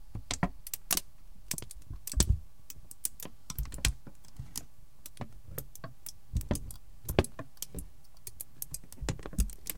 Moving a wrinkled sheet of aluminium paper. // Moviendo una hoja de papel de aluminio arrugado.